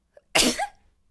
A single sneeze by a girl. (Accidentally sneezed while recording something, I saved it in case someone needs a sneeze sound)